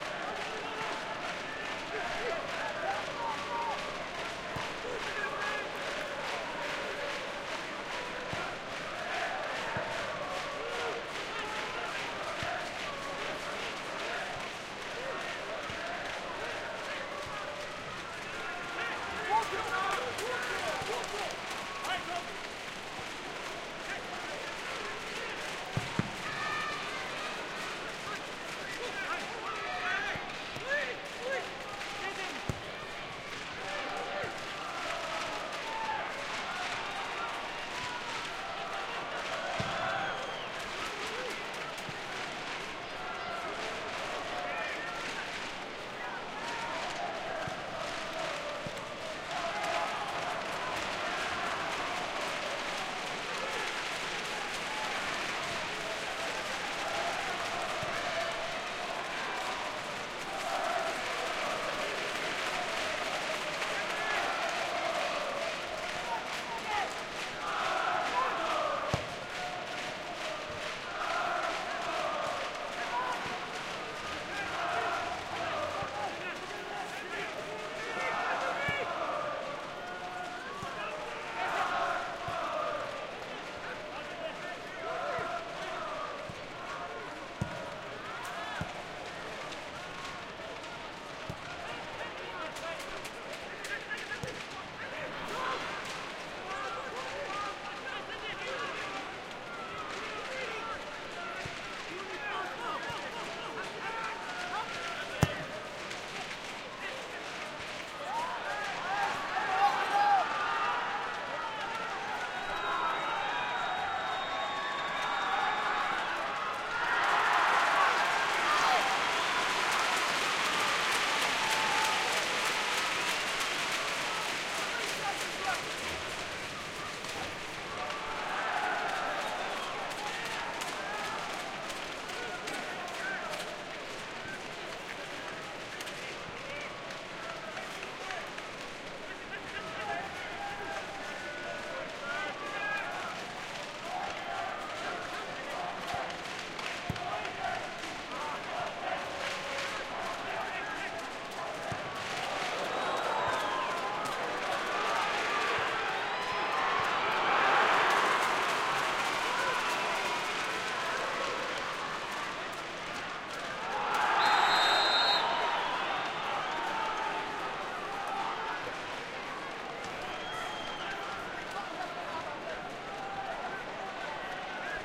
Norwegian football match/soccer game ambience
General ambience recorded from the football match between Viking and Sarpsborg on Sunday November 11th at the Viking football stadium in Stavanger, Norway. The ambience was recorded with the Zoom H6 and its XY stereo capsule.
game, norway, tippeliga, viking, xy